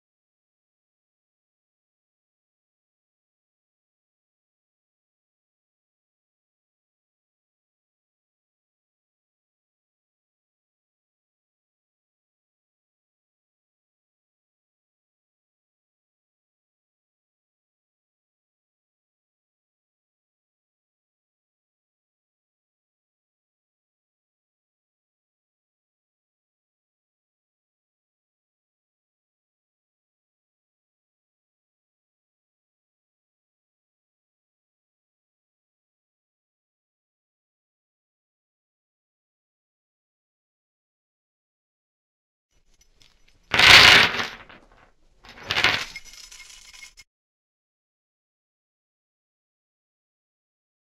Large Chains
There's a better/easier to use version of this called chains Effect which gives both the chain drop and tightening without the 40 second dead space in front of it.
chains, clattering